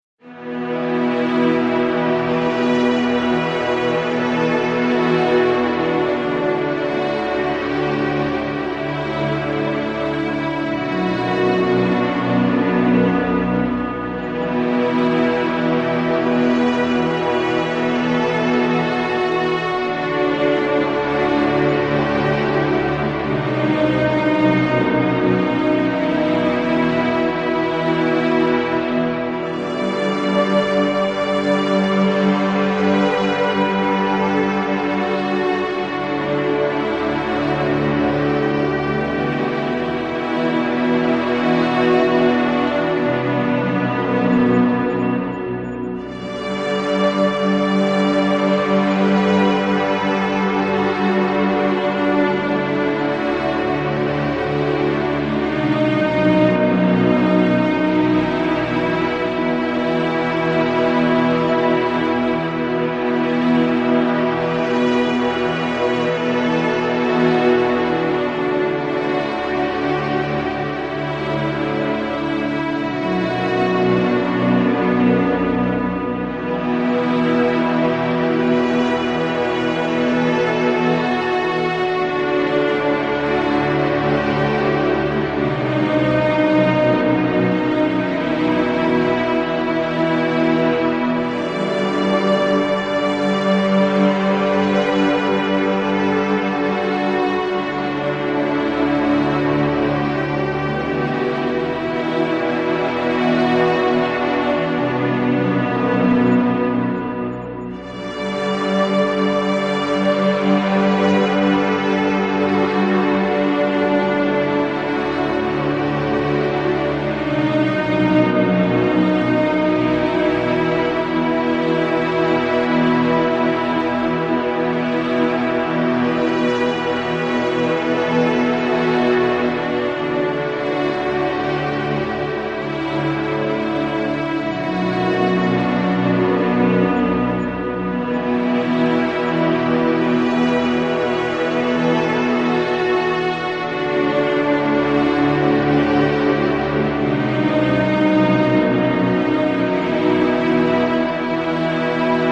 Greensleeves music played on keyboard by kris klavenes
hope u like it :D